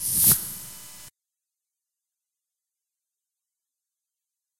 airpipe swoosh 02
sound of pipe swooshed